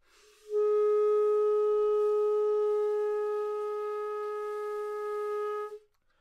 Part of the Good-sounds dataset of monophonic instrumental sounds.
instrument::clarinet
note::Gsharp
octave::4
midi note::56
good-sounds-id::2303
clarinet, good-sounds, Gsharp4, multisample, neumann-U87, single-note